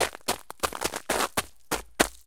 footsteps - ice 07
Walking on a pile of ice cubes while wearing mud boots.